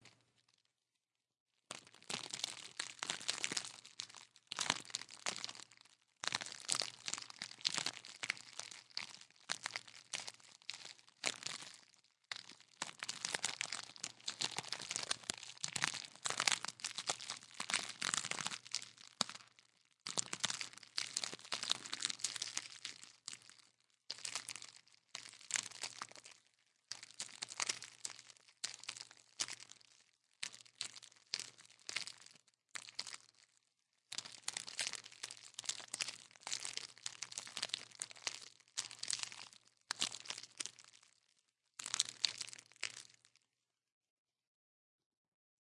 PLASTIC HANDLING
Sound of plastic being handled.
bag, crackle, handling, plastic, squeak, squishing, wrap, wrapping